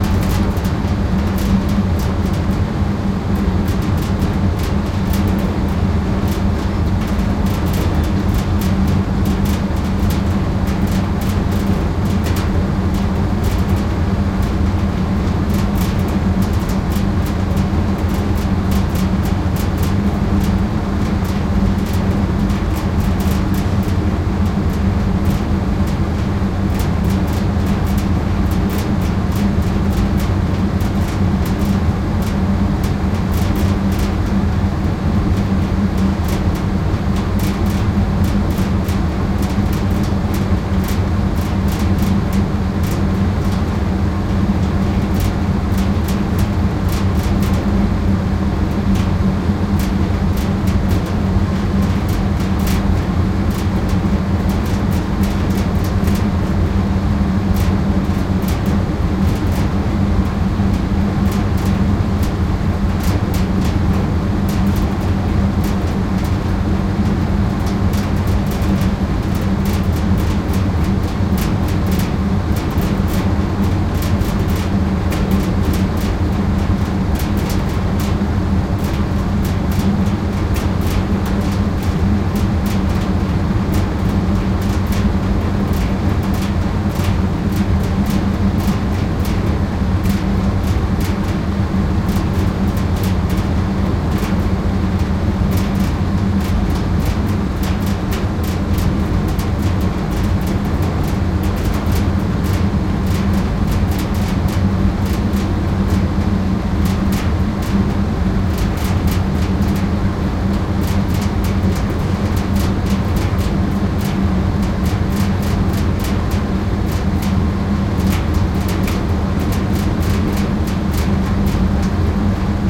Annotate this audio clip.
vent heavy ventilation metal rattle closeup
rattle, closeup, ventilation, metal, vent, heavy